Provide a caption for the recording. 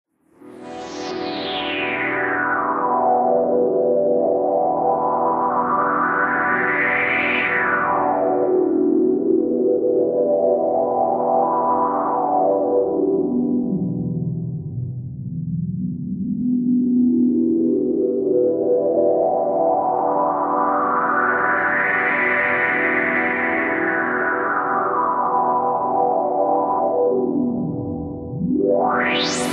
A luscious atmosphere made by adding various wet delay and reverb effects to a pad sequenced with a chord